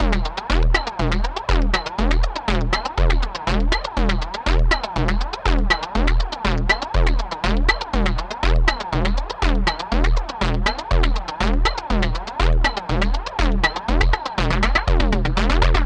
Nero Loop 4 - 120bpm
Loop, 120bpm, Percussion, Nero, Distorted